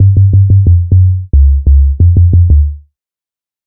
10 ca bass line
These are a small 20 pack of 175 bpm 808 sub basslines some are low fast but enough mid to pull through in your mix just cut your low end off your breaks or dnb drums.
808, drum, kick, sub, bass, techno, jungle, beat, loop, dance